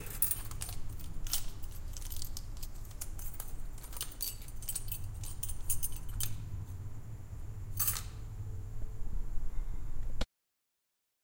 Picking up keys